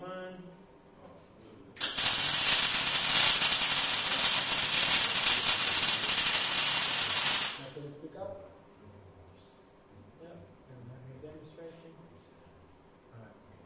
industrial welding long3
long duration mig weld
arc-weld, medium, mig-weld, weld, welding